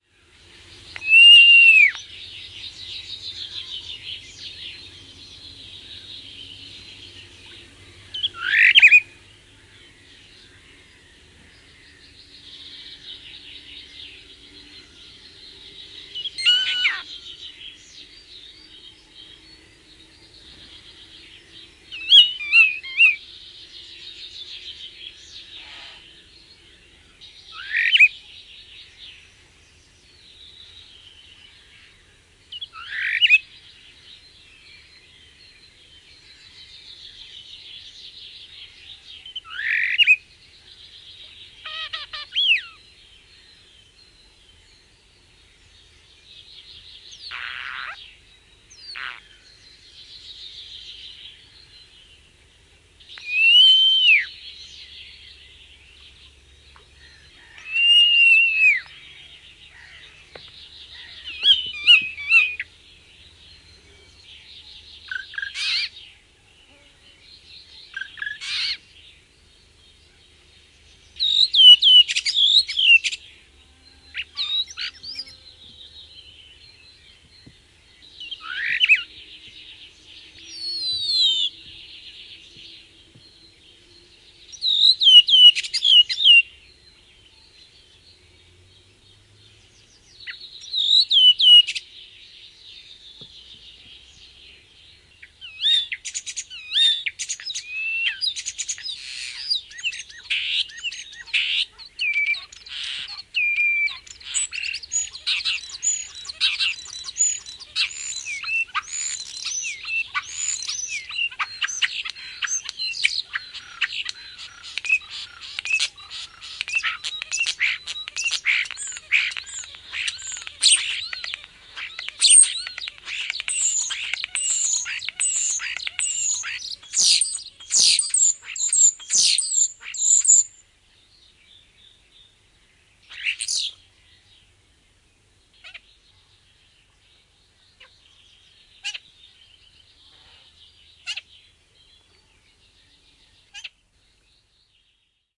Kottarainen, laulu / Starling, song, singing, starting peacefully, gets exited towards the end, other birds in the bg (Sturnus vulgaris)
Kottarainen laulaa, alussa rauhallista, innostuu vähitellen. Taustalla muita lintuja. (Sturnus vulgaris).
Paikka/Place: Suomi / Finland / Vihti
Aika/Date: 08.06.1968
Finland, Yle, Song, Linnunlaulu, Starling, Soundfx, Birdsong, Birds, Lintu, Finnish-Broadcasting-Company, Nature, Singing, Suomi, Laulu, Field-Recording, Bird, Tehosteet, Yleisradio, Kottarainen, Linnut, Luonto